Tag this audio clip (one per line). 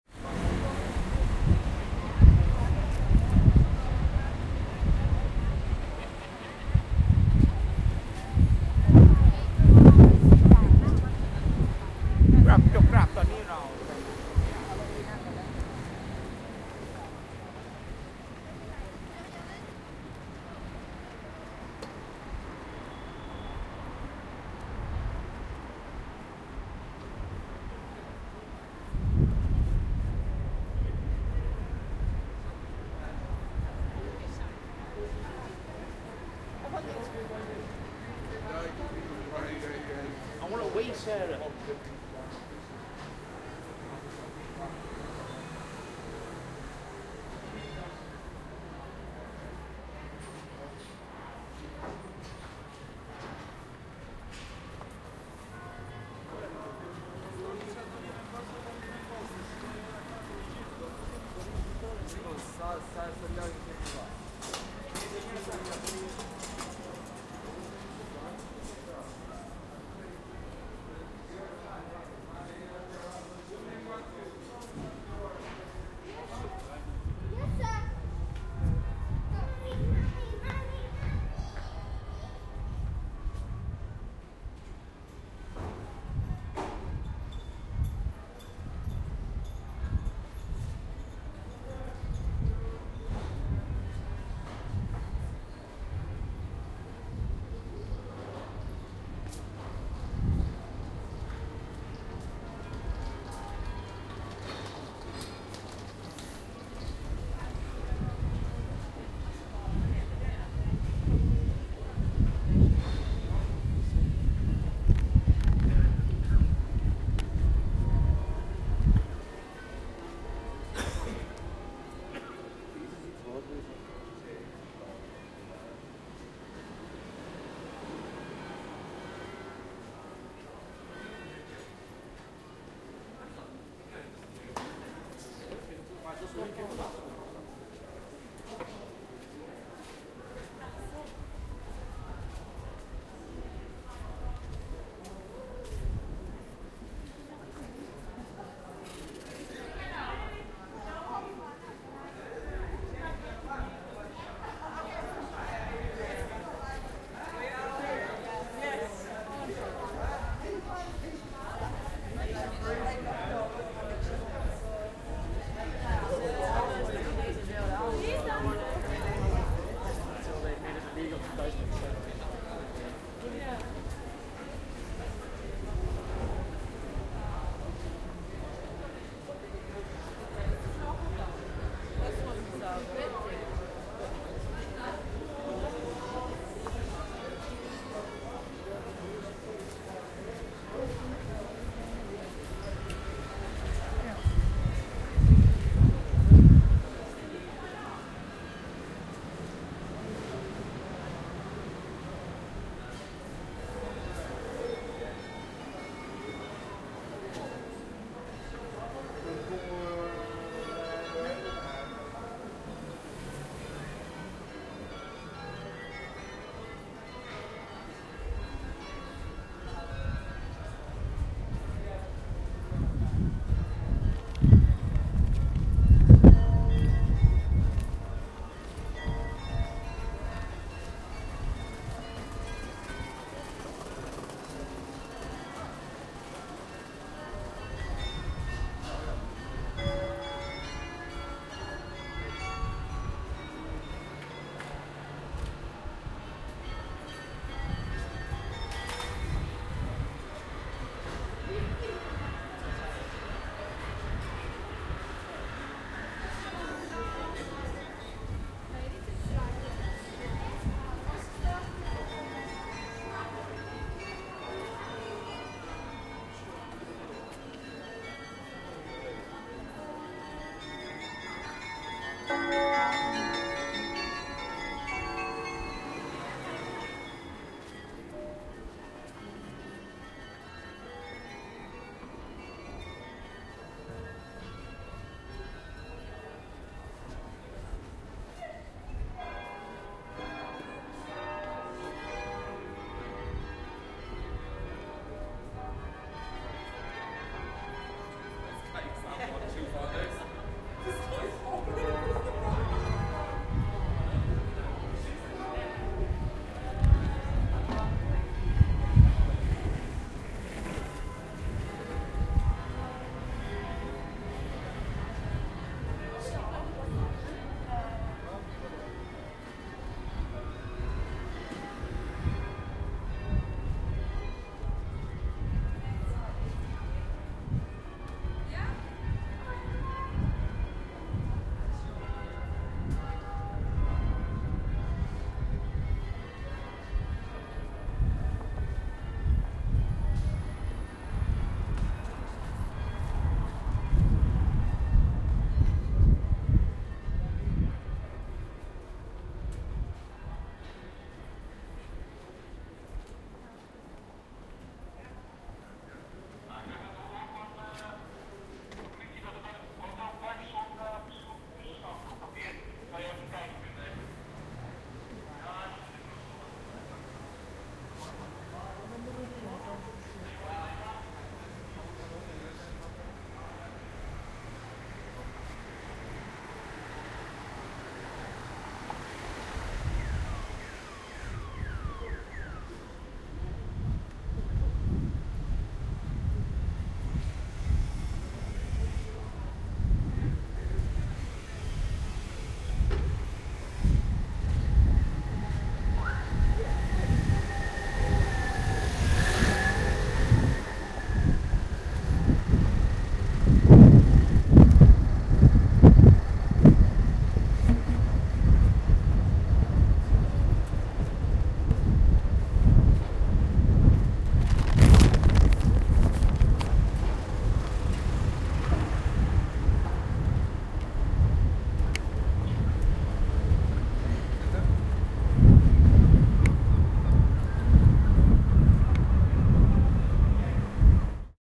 center
warmoesstraat
street
field-recording
afternoon
people
noise
amsterdam
walking
police
dam
stroll